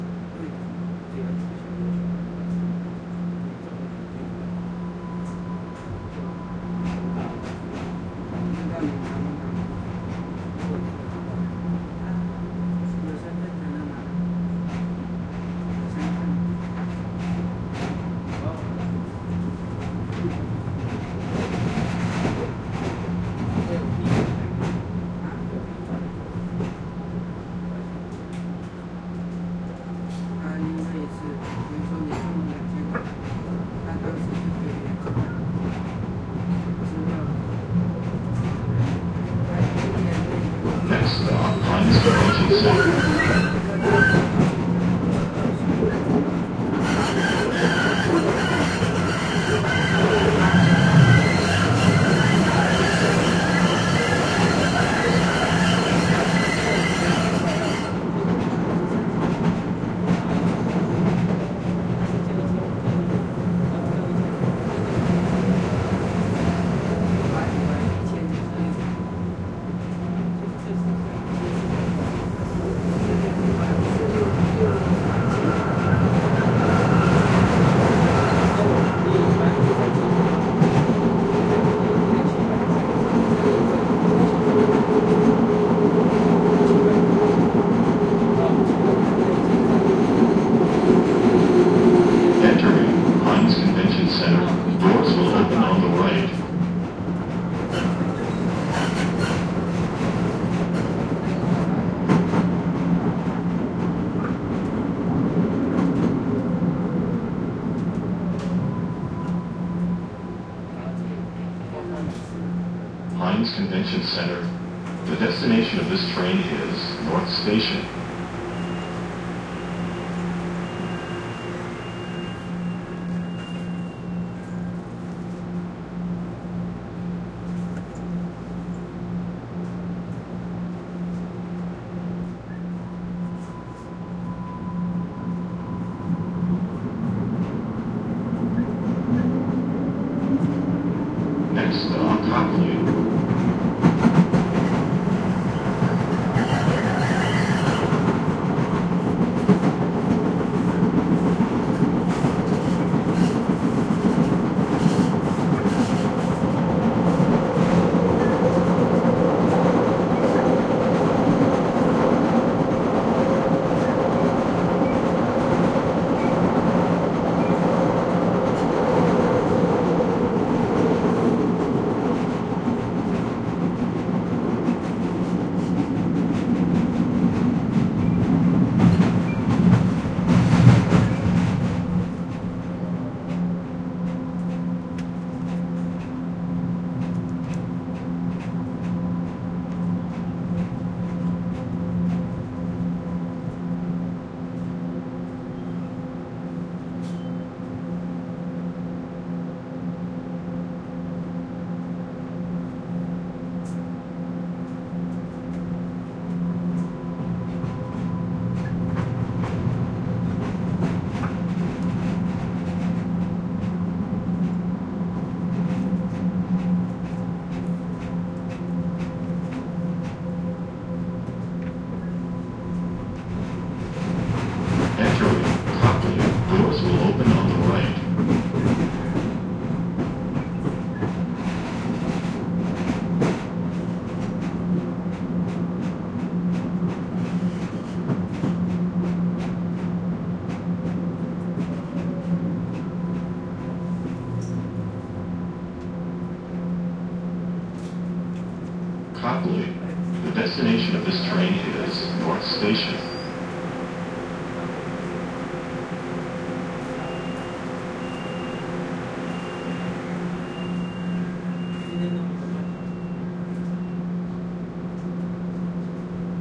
Riding the Green Line T from Kenmore to Copley.Recorded using 2 omni's spaced 1 foot apart.
mbta, subway, t, stereo, field-recording, train, boston